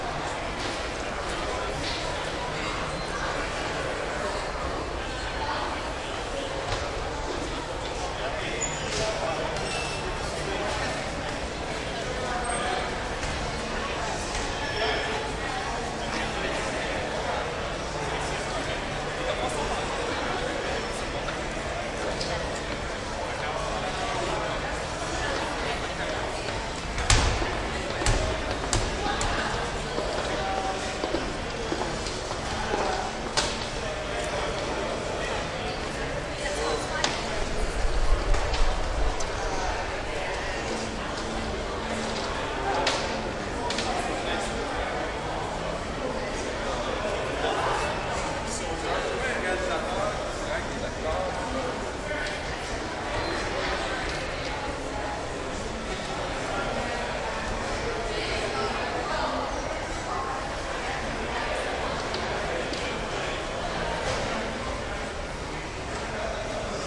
crowd int medium movie theater lobby Paramount1 Montreal, Canada
crowd, lobby